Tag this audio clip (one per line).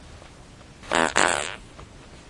explosion
fart
flatulation
flatulence
gas
noise
poot
weird